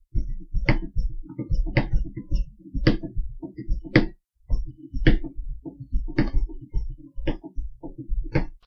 a simple beat i drummed on my chair while bored
beat, unprocessed